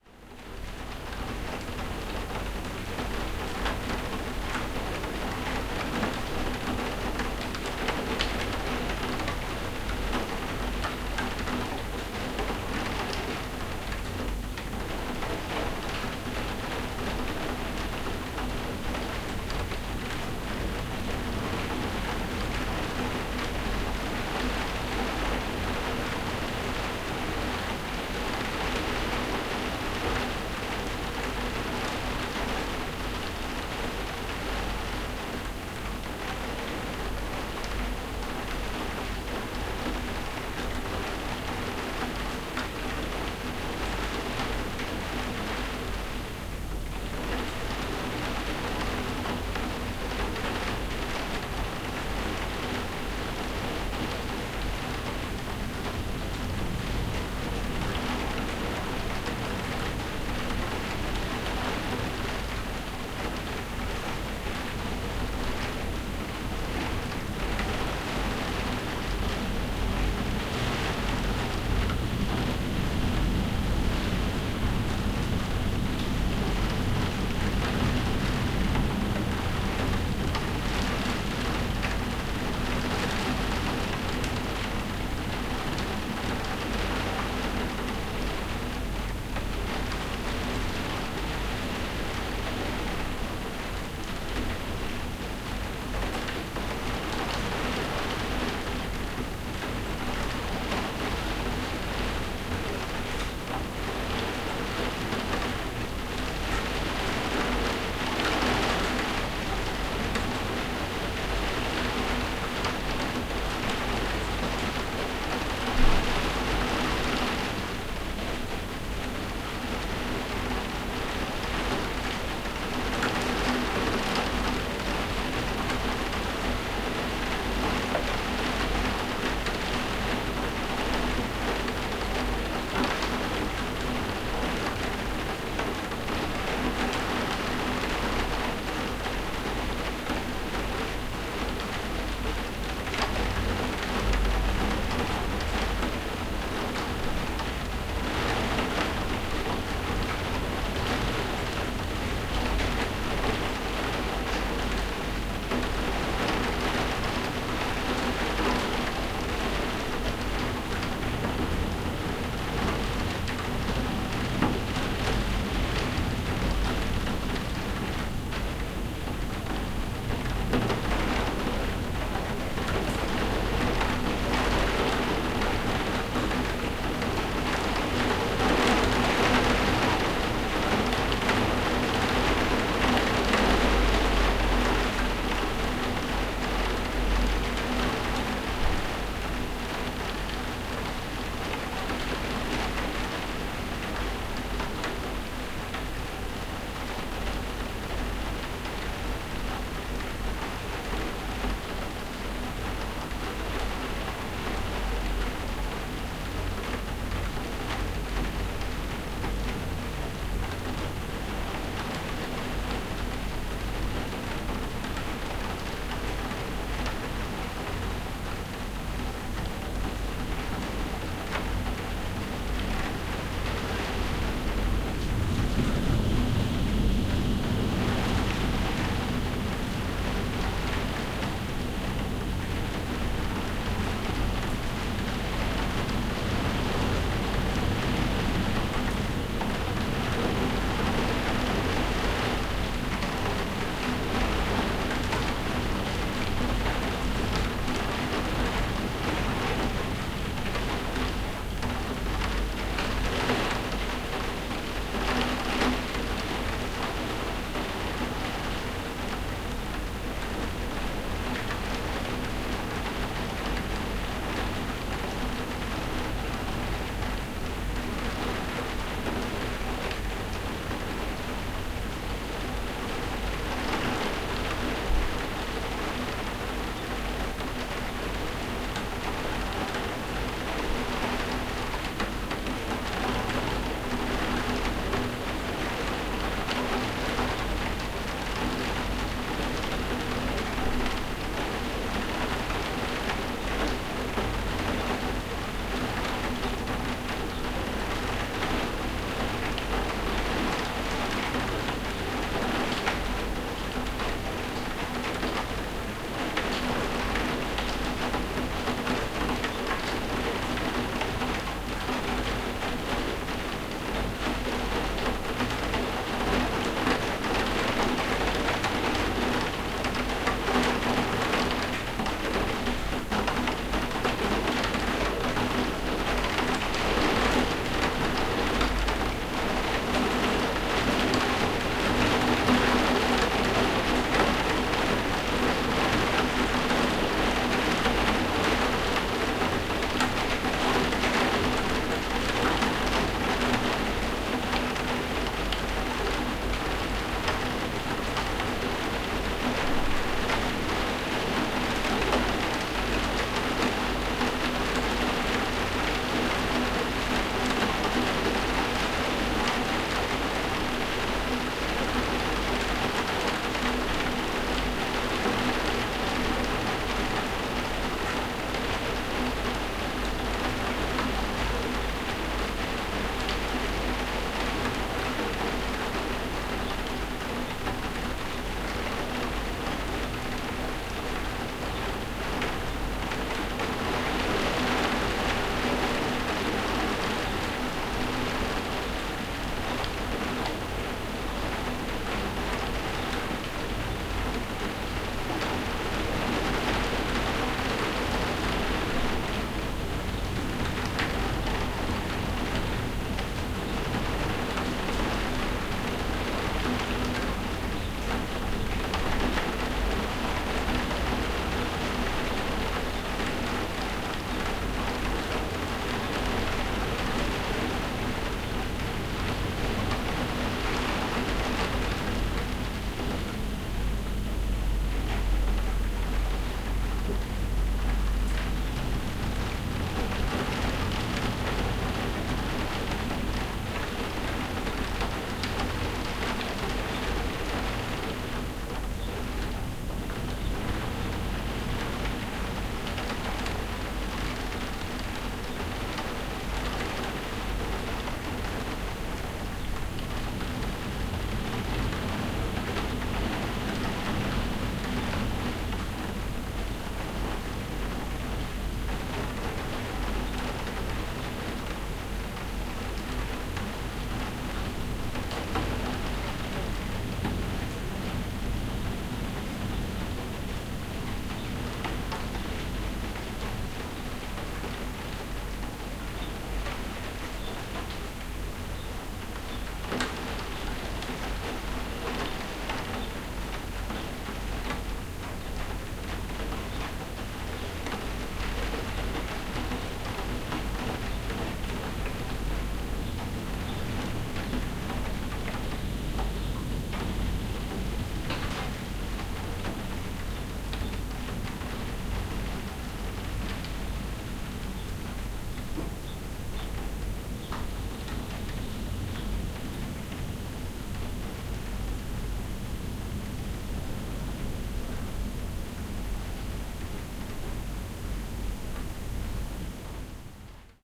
Rain On Skylight
A stereo recording of rain on a skylight. Rode NT-4 > Shure FP 24/SD Mix Pre > Sony PCM M10